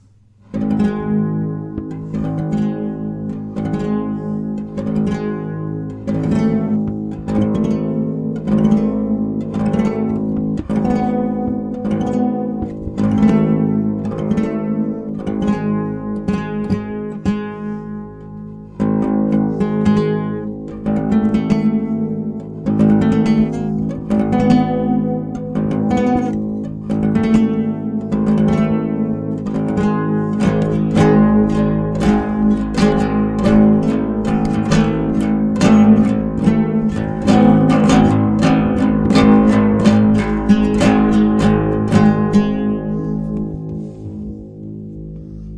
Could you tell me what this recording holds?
I have always admired gutarists like Segovia, Eric Clapton, Mike Oldsfield, Woodie Guthrie, BB King to mention some. Myself I play nothing, didn't have the luck to grow up in a musician family. So I have whole mylife seen myself an idiot who can never learn an instrument. Had a visit recently, a friend of my daughter. He found an old, stringless guitar among a lot of stored stuff in our cellar. He had just bought a set of strings for his guitar, but he mounted them on our guitar. He was playing for an hour and I said how I envy him. Why, he answered, here, sit down and play. I put THe instrument aside and told him I was too stupid. Well, maybe, he replied, but most of us guitarists are idiots. To my surprise I found the strings, but had certain problem to press hard enough to get a clear tone. I tried for a couple of hours and recorded the fumbling and rattling. Next day I tried again and recoded and I spent an hour for five days. You can follow file novasound330a to 330e.
learning, Guthrie, newbe, guitar, Woody, Segovia, Andre